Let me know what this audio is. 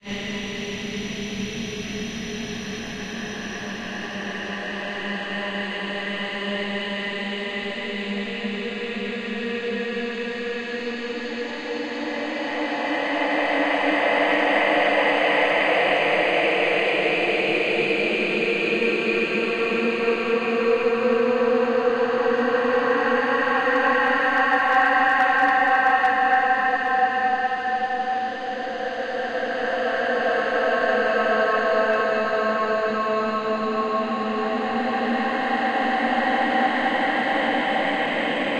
Almost illegal, horrifying and purely evil noises created by paulstretch extreme stretching software to create spooky noises for haunted houses, alien encounters, weird fantasies, etc.